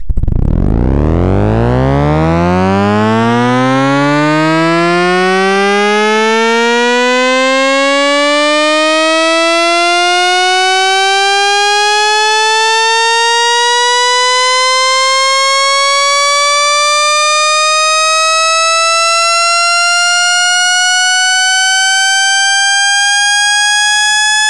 A loooong slide I have made with a synthesizer a friend of me built.
synth, long, slide